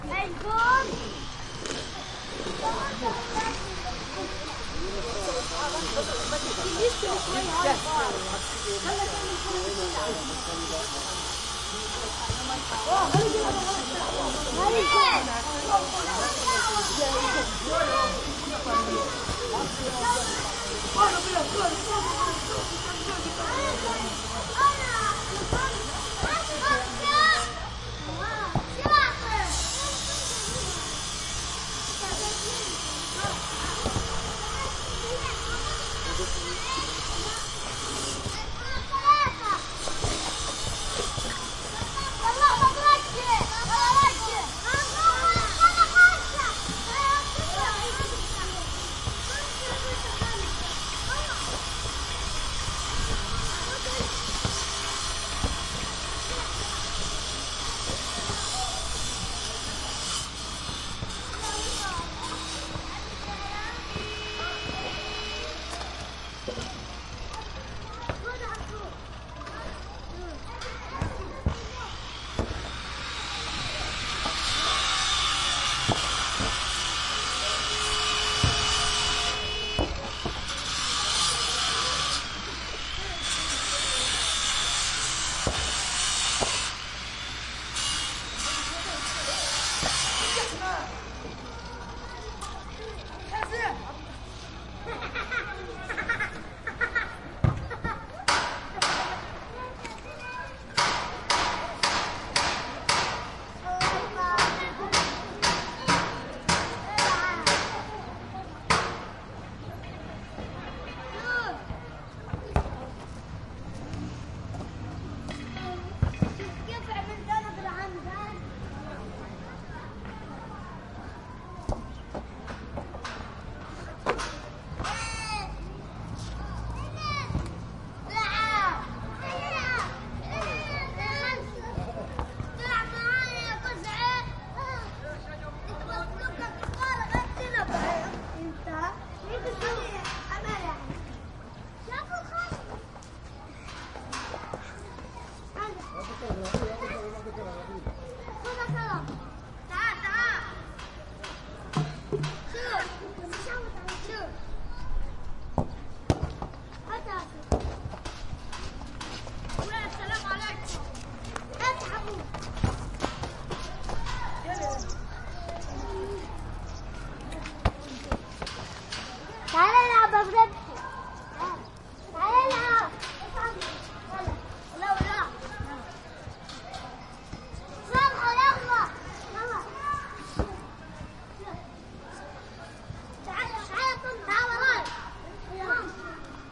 ruins Palestinian children kids kicking soccer ball in rubble in front of bombed apartment with distant construction table saw and banging middle Gaza 2016
children, kids, Palestinian, playing, ruins, soccer